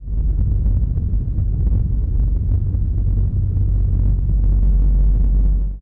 Not actually a rocket taking off. It's a recording of a fan with some effects applied.